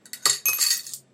Coins falling
Save a first audio track = coins pour into a hand
Save a second audio track = coin fell into a glass
Project => Quick mix
Normalize